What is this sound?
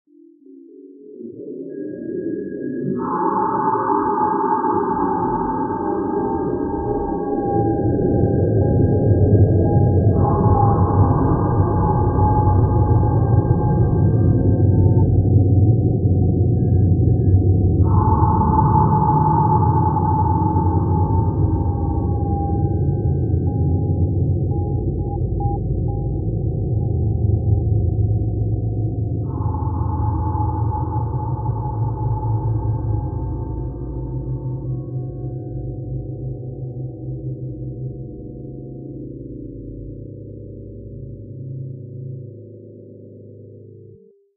The scream male_Thijs_loud_scream was processed in Spear, creating a submarine-like underwater sound.